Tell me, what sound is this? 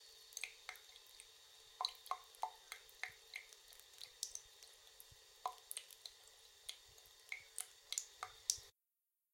A running and dripping tap
water, bathroom, running, Dripping-tap, dripping, bath, drain